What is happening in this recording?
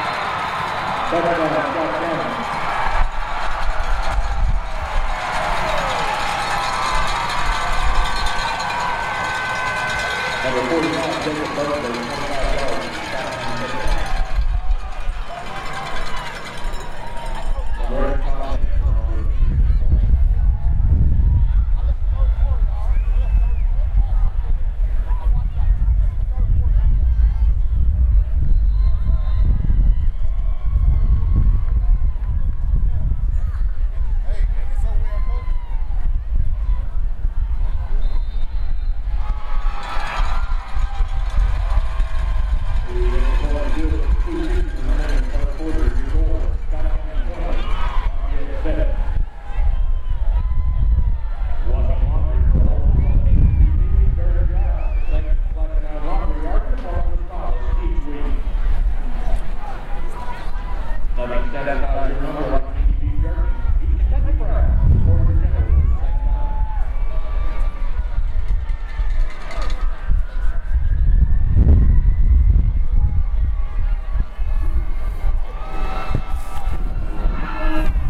161125-005americanfootball-st
American football in Lexington, Kentucky.